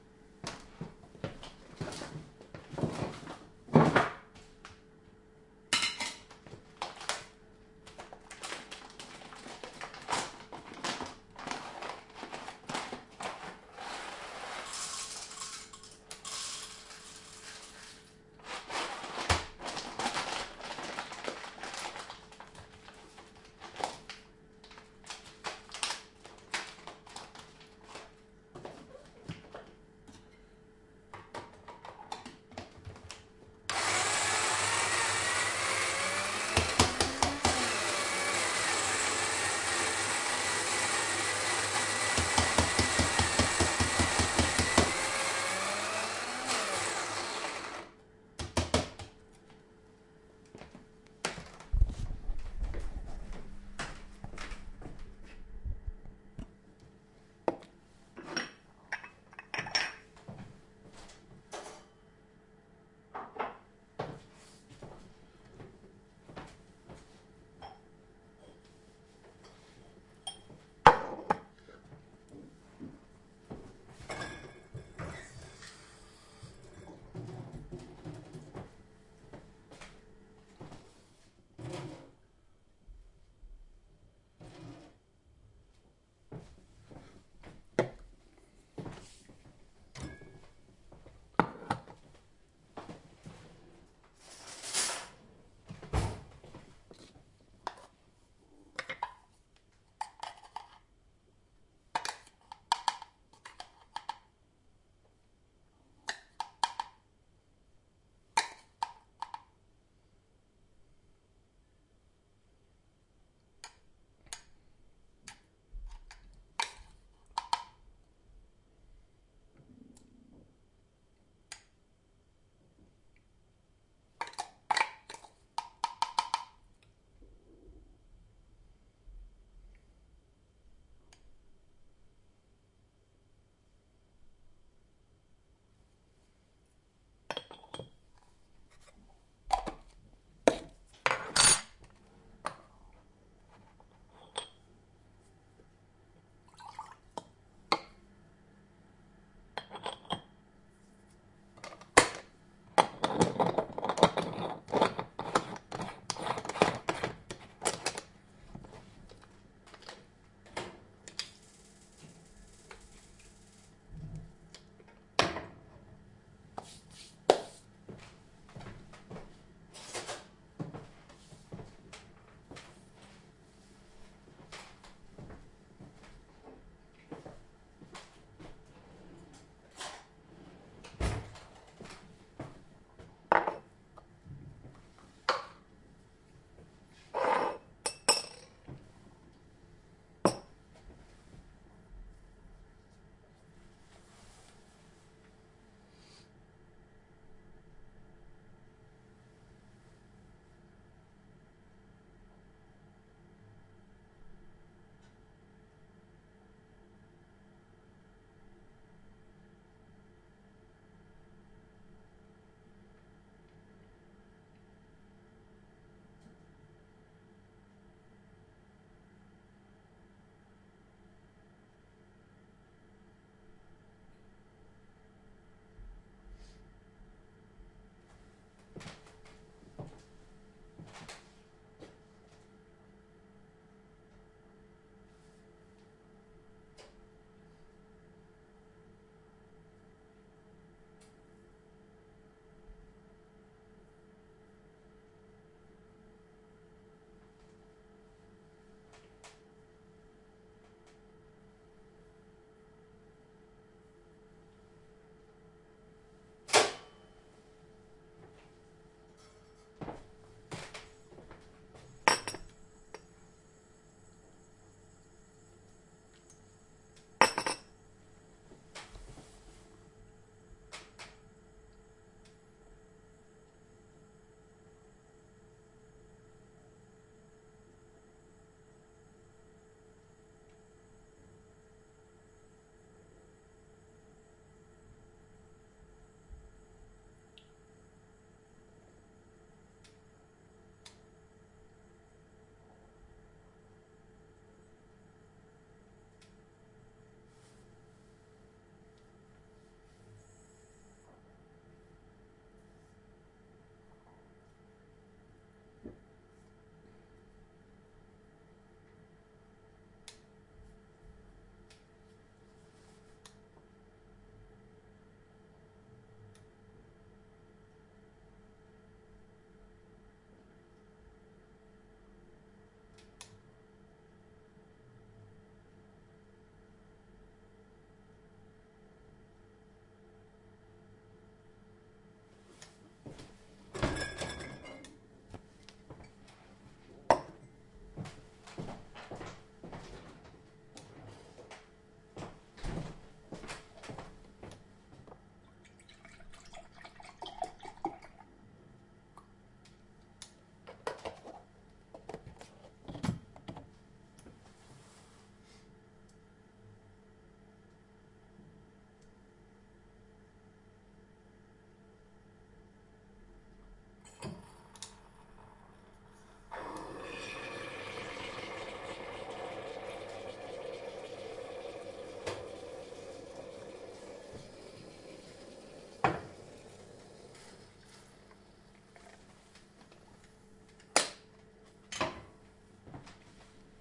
STE-038 cooking espresso bialetti brikka
field recording of a whole process preparing and making espresso. steps on the wooden floor, grinding coffee beans, walking to the stove, putting the espresso cup on toaster for warming up, waiting, preparing a pot with milk to put on the stove later, the explosive valve opening and streaming of espresso into the top part of the cooker. the ambiance is set up by the central gas heating.
stereo wave-recording, not processed, with internal zoom h2 90°-mic